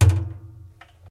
Big object close with bounce
metal, low, kick, object, thump, bass, boom